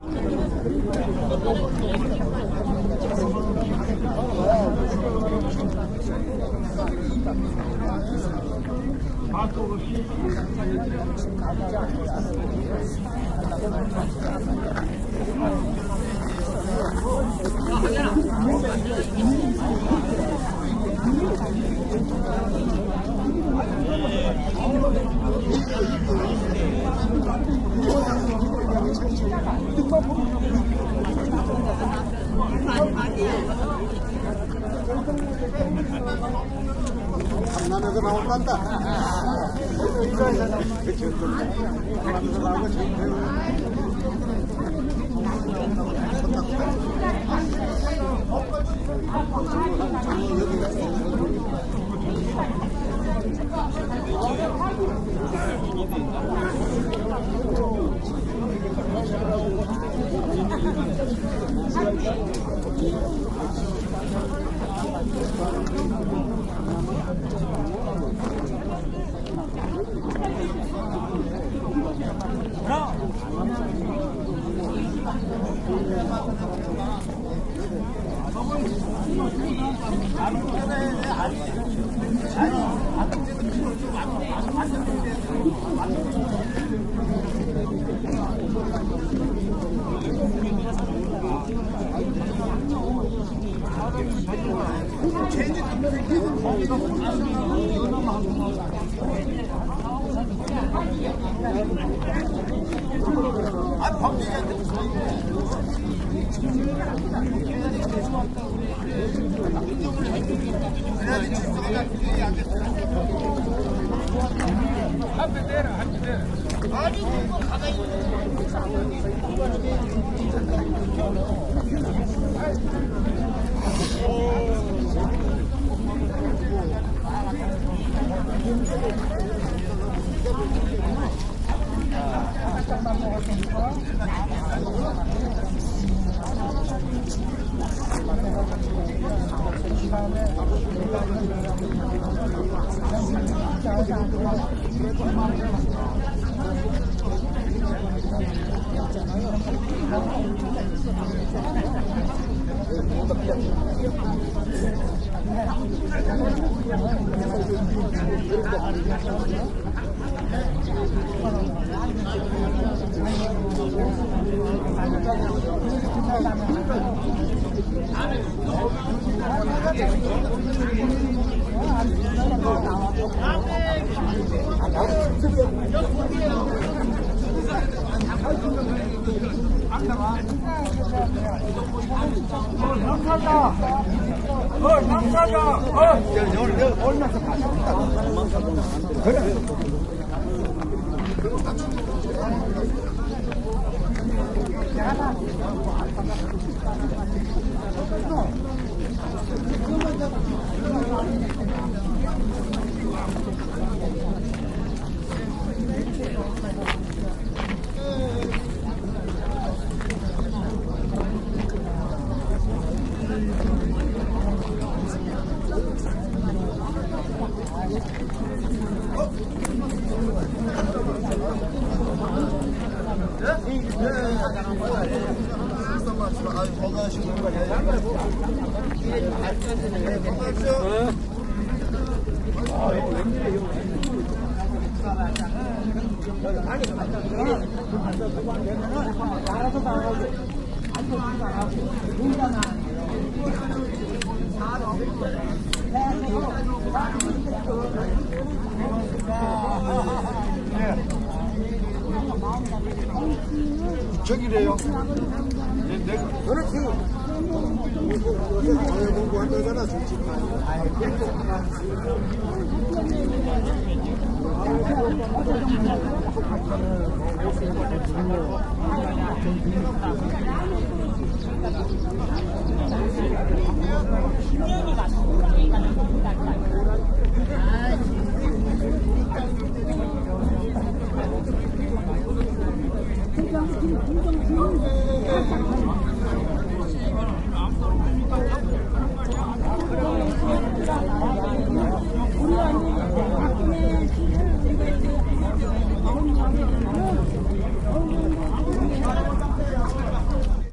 0097 Tourist guides waiting
Many -tourist guides- wait and talk
20120118
voice, seoul, field-recording, korea, korean